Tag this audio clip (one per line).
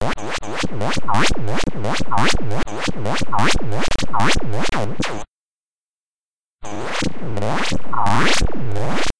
vinyl
djing
dj
sounds
scratch
record
rustle
scratching